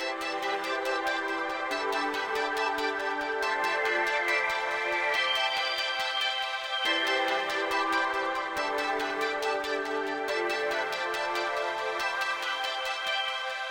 MF Stars waves
Melodic Synth for house, progressive house, trance, Etc.
EDM, melody, pad, progression, synth, techno, trance